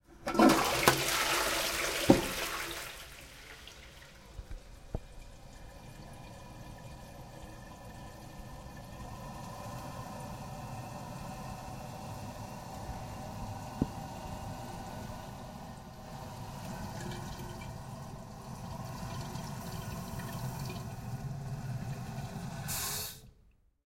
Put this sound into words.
toilet chain and flushing
At first there are the sound of the button that actions the chain and then the toilet flushing. The latter sounds as white noise filtered with a high-pass filter.